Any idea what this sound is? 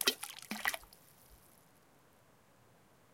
Tossing rocks into a high mountain lake.
percussion, water, splashing, splash, bloop